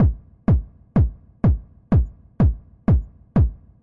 Kick house loop 125bpm-04
loop, kick, 125bpm